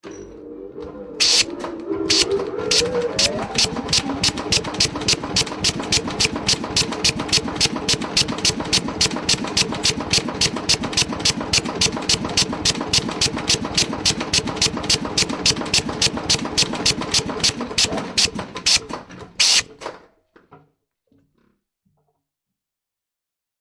Power hammer - Billeter Klunz 50kg - Suction vent full cycle
Billeter Klunz 50kg suction vent full cycle.